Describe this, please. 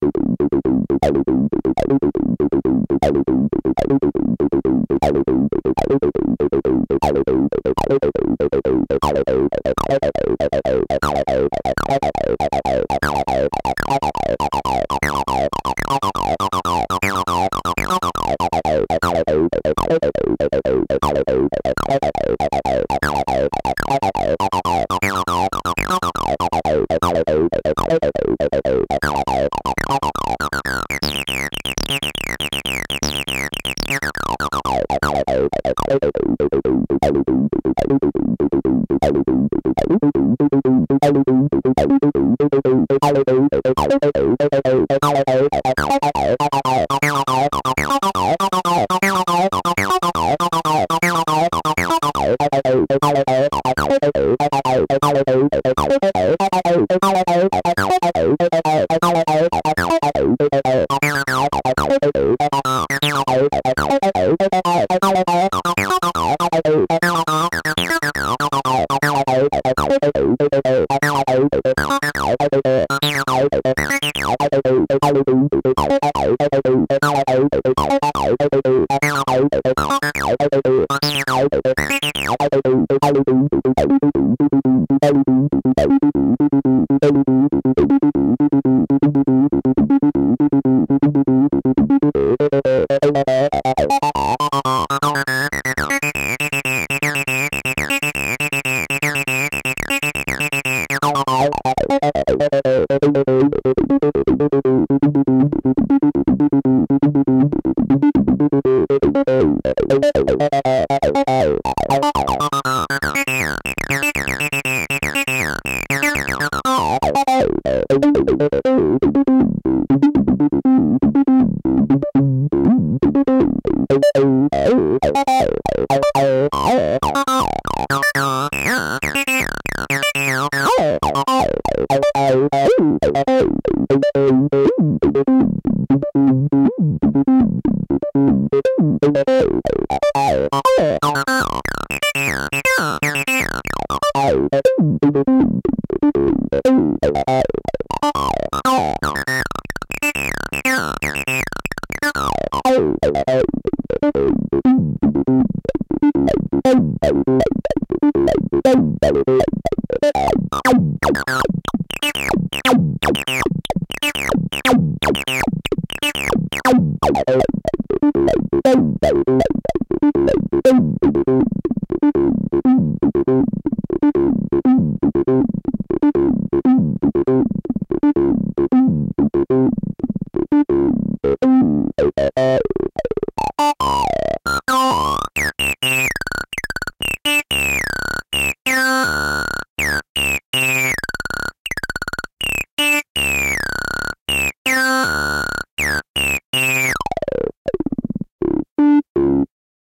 tb-03 120-40bpm random03
Sampled from my Roland tb-03. Created by using the randomize function and with the built-in distortion turned up. The tempo is lowered at the end.